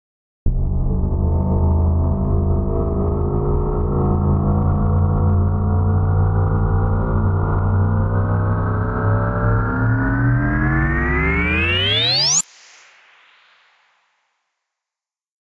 warpdrive-medium
The result of a preset made a long time ago in Logic Pro's Sculpture PM Synth. This pack contains a few varied samples of the preset.
build, cruiser, drive, gun, hyper, laser, long, pitch, sci-fi, ship, space, spaceship, star, synth, warp